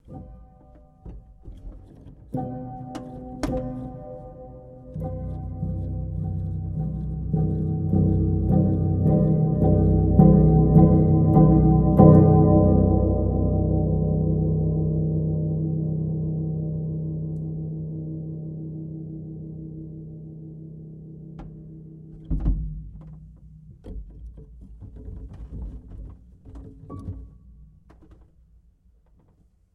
Upright Piano Noise 18 [RAW]
Some raw and dirty random samples of a small, out of tune Yamaha Pianino (upright piano) at a friends flat.
There's noise of my laptop and there even might be some traffic noise in the background.
Also no string scratching etc. in this pack.
Nevertheless I thought it might be better to share the samples, than to have them just rot on a drive.
I suggest throwing them into your software or hardware sampler of choice, manipulate them and listen what you come up with.
Cut in ocenaudio.
No noise-reduction or other processing has been applied.
Enjoy ;-)
noise, out-of-tune, recording, sample, upright-piano, character, acoustic, pianino, un-tuned, untuned, raw, dirty, sample-pack, noisy, pack